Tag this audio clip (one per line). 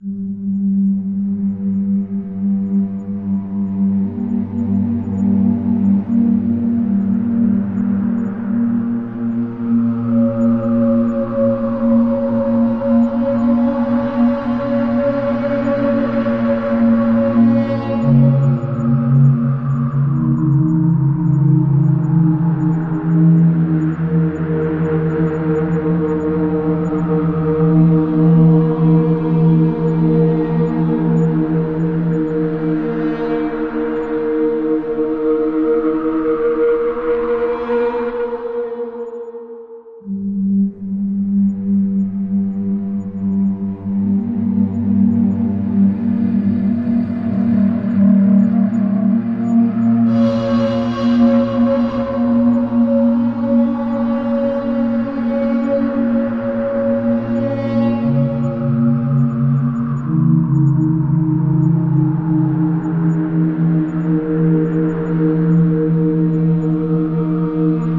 weird
haunted
suspense
background-sound
soundscape
thrill
drama
creepy
atmos
phantom
sinister
orchestral
sad
atmosphere
bogey
terrifying
drone
terror
dramatic
background
anxious
atmo
mood
spooky